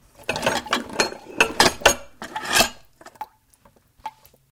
Dishes clanging and banging
dishes, bang, clang